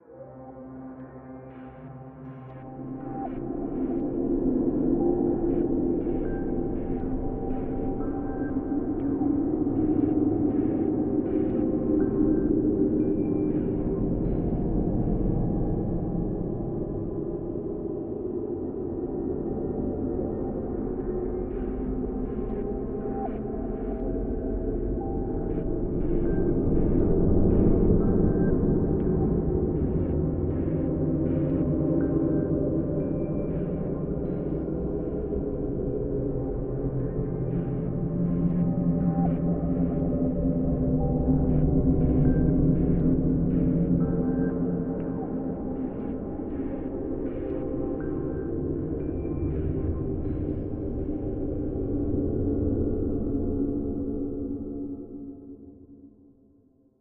Industrial sounds M/S Recording --> Processed in logic by using (Stretch/reverb/delay/spectral fft)
design, effect, fx, boom, drone, garage, horror, cinema, sound, film, woosh, hits, effects